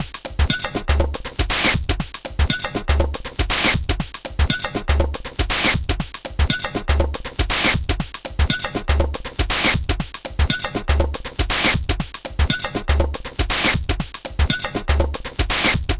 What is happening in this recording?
metal factory
harsh, minimal, beat, industrial, loop, techno, percussion